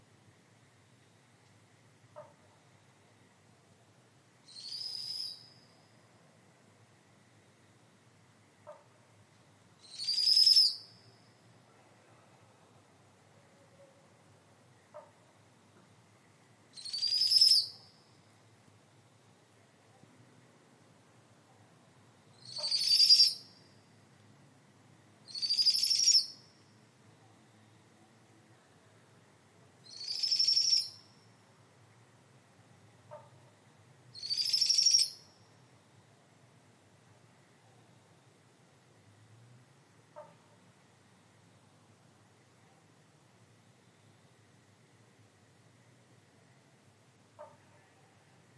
powerful owl whistle
Powerful Owl (ninox strenua) whistling at night ... frog calls in background ... in forest North East of Melbourne, Australia ... amazing, eerie sound - two owls perched in a tree, one calling the other as they prepared to hunt for the night ...